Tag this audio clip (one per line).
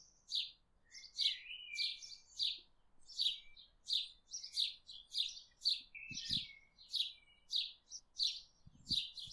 chirping
spring
nature
sparrows
field-recording
tweet
birdsong
chirp
birds
bird
sparrow